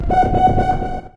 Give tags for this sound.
multisample one-shot synth

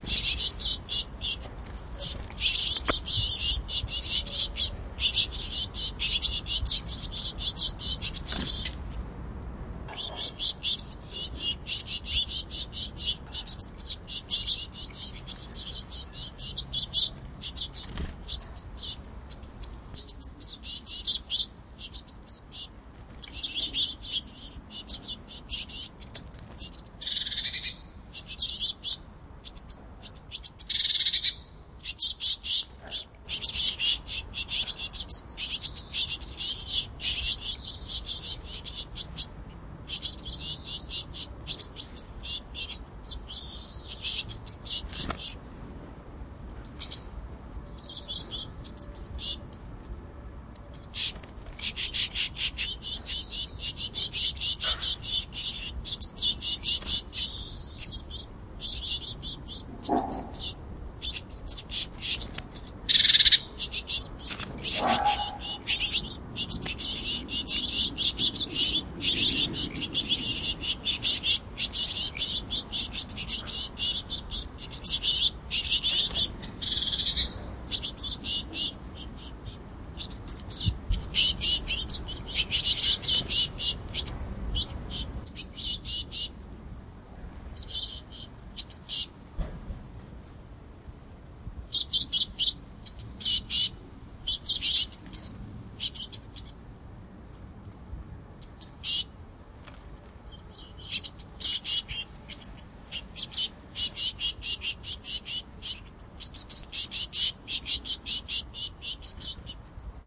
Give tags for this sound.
blue park germany chicks bird tweet field-recording tit berlin spring birdsong birds blue-tit chick nature

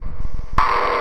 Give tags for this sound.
rhythmic industrial 120BPM loop

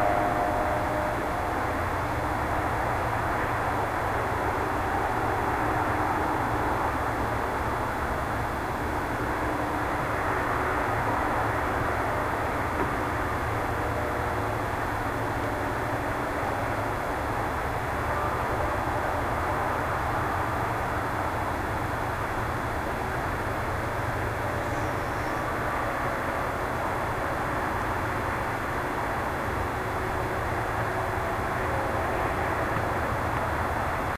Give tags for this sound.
field-recording hydrophone road traffic

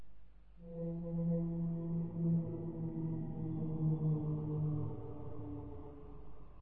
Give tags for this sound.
haunted breath Ghost moan

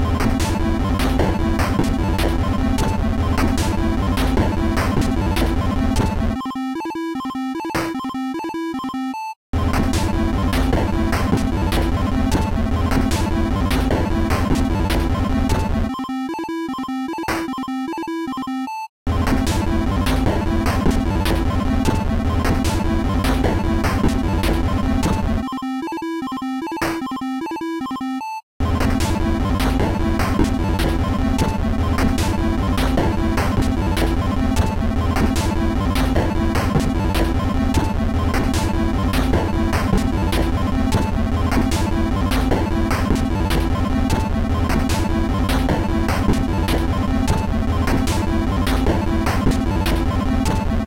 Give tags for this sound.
Dance Loop Beep Techno Cool Clap Electro

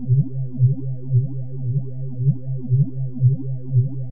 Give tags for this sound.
a modulated sine

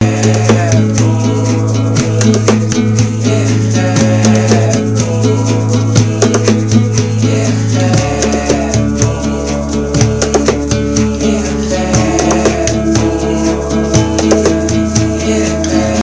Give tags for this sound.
acoustic-guitar free guitar indie loop piano rock vocal-loops

